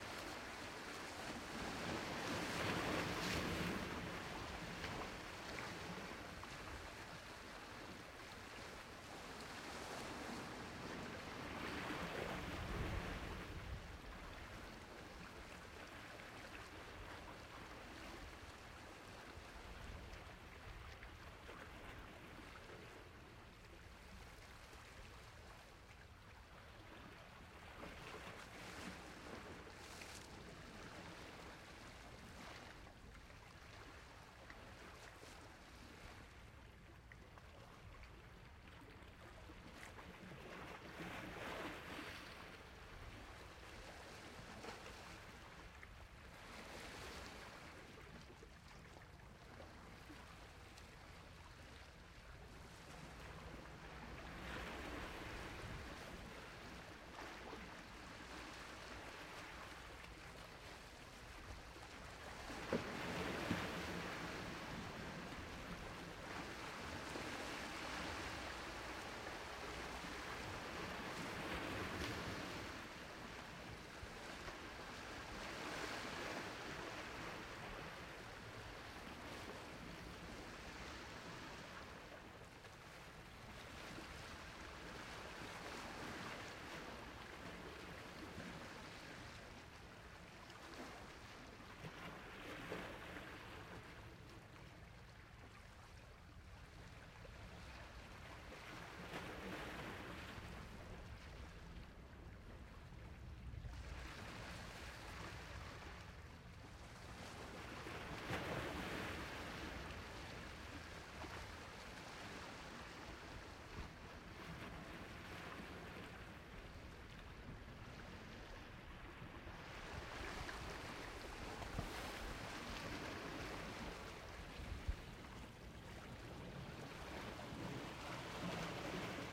Untouched seaside soft waves recorded on Kudaka island in Japan with Zoom H1 and Rode video mic. Enjoy!